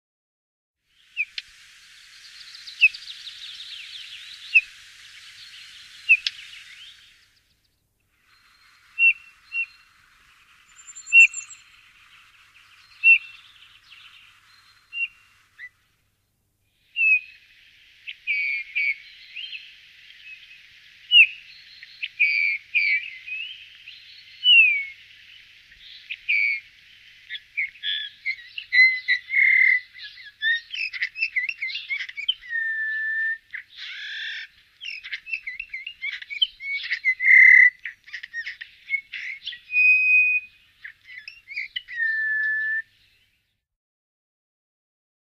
bird sound Pyrrhula pyrrhula